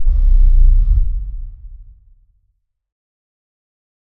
Sounds mechanical to me, idk. Sub-y and reverberant.
industry, monster, rumble, factory, rattle, quake, robot, machine, noise, steamengine, shudder, steam, industrial, mechanical, shake, motion, collapse